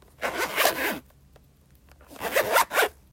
Zipper; openshut
Opening and closing a zipper on a small square pouch
zipper, zipper-open, zip